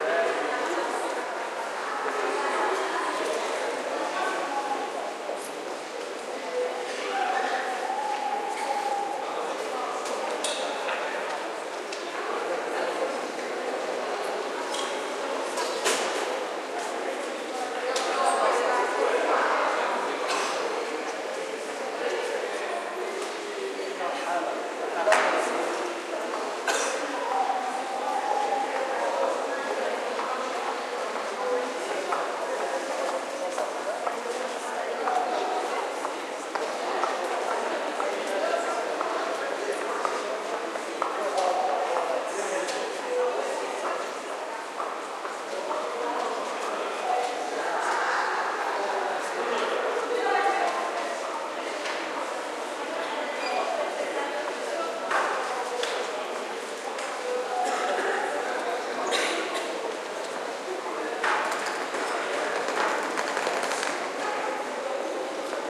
ambient sound inside the Liège Guillemins train station.
recorded on tascam dr-08.